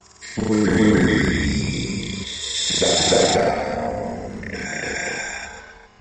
Created using my voice and Audacity.
Audio, Effect, Experiment, Free, Male, Sound, speech, Voice